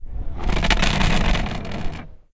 Chair moving
Char moving outside the bar. It scratches the ground and makes an unpleasant sound.
campus-upf, chair, moving, outside-bar, scratch, UPF-CS12